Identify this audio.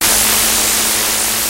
This is a lead synth sound I made for the XS24 on the Nord Modular G2 and Universal Audio UAD emulations of the Neve EQs, LN1176 Limiter, 88RS, Fairchild, and Pultec EQs. Also used the Joe Meek EQ from protools.
synth, psytrance, modular, nord, darkpsy, fm, lead, goa, g2